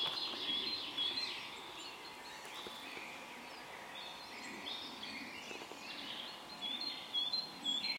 birds singing II
I recorded an atmosphere of birds singing in the forest.
forest, sing